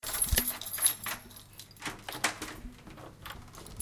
session 3 LBFR Mardoché & Melvin [9]
Here are the recordings after a hunting sounds made in all the school. Trying to find the source of the sound, the place where it was recorded...
france, labinquenais, rennes, sonicsnaps